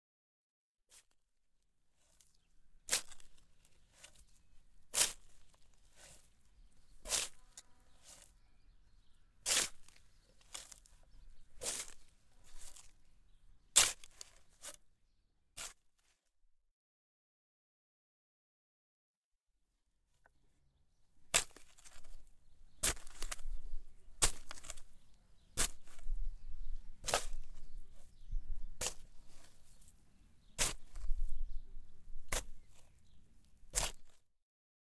working with a shovel
shovel, panska, garden